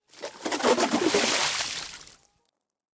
ice drill pull out of hole gushy splash with slush and snow nice detail2
ice drill pull out of hole gushy splash with slush and snow nice detail
hole, slush